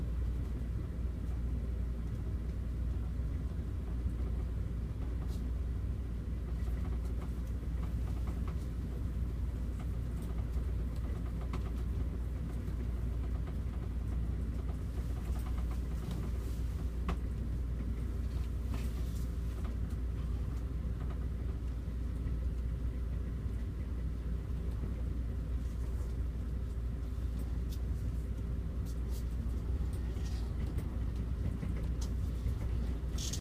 Interieur train
Son d'une vidéo prise de l'intérieur d'un train en direction des Maritimes au Canada/Sound from a video taken from inside a Maritimes-bound train, Canada (iPad)
ambience, ambient, atmosphere, canada, field-recording, interior, ipad, maritimes, train, video